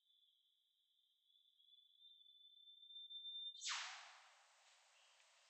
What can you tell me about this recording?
Eastern Whipbird - Psophodes Olivaceus 2
The Eastern Whipbird is to me the 'signature sound' of the tropical rainforest of northern Queensland, although it can be found down the whole East coast of Australia.
psophodes-olivaceus, rain-forrest, eastern-whipbird, binaural, field-recording, australia, bird, wet-tropics